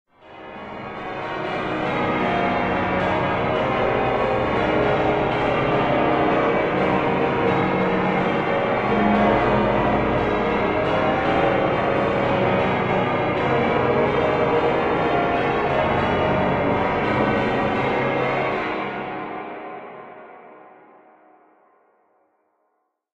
The result is a wash of distant church bells of various sizes.
An example of how you might credit is by putting this in the description/credits:
The sound was recorded using a "H1 Zoom recorder" on 5th December 2017, also with Kontakt and Cubase.